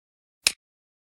Different Click sounds
Click, stone